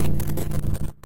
Viral Noisse FX 03